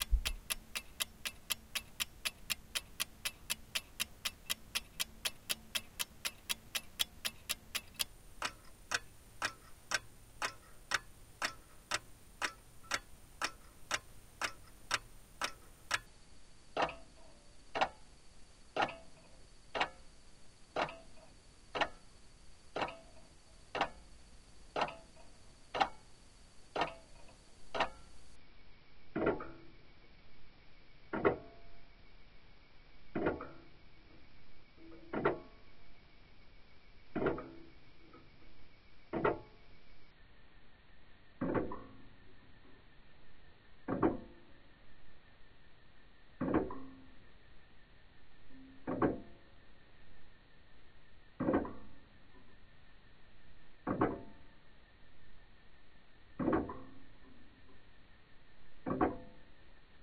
Tabletop clock ticking, speed ramp down (followup)
Small tabletop clock ticking away, slower and slower. Second edition (no pun intended), without anyone speaking this time
Half, quarter, eighth and tenth speed
Over the years my H1 got quite a bit noisier it seems. :( I don't know yet if it's the preamps or the capsule itself.
clock, tock